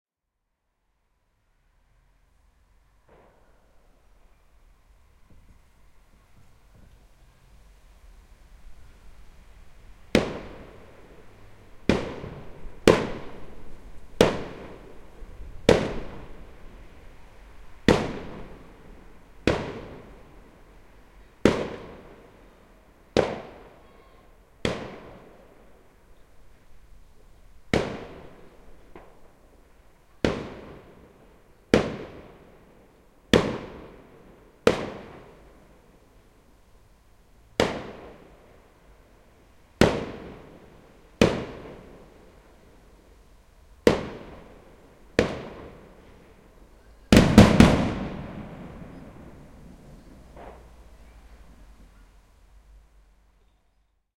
This is a recording of someone near by letting off a large barrage firework which concludes with a finale of very powerful, loud shells. It was recorded on bonfire night 2013.
This recording is in quasi binaural, so listen with headphones to get the full effect.
Recording date: 2013/11/05
Recording location: Crosby, Liverpool, UK
Recording equipment: 2X miniature electret condenser microphones arranged in quasi binaural, into the olympus LS14 digital recorder.